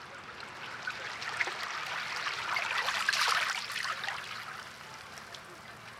Water 002: A mono recording. Recorded at San Francisco's Aquatic Park October 2009. Lower frequencies and (some) ambient noise removed.Length: 0:06@120bpm